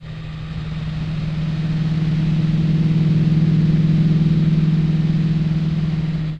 Spacecraft Ascent 02
Spacecraft taking off, ascending
Alien-Vehicle, Ascending, Science-Fiction, Sci-Fi, Spacecraft, UFO